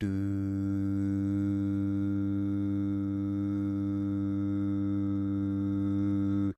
I sung the G2 tone. It should be used as a bass in some beatbox song.
bass, beatbox, dare-19, G2, sing, voice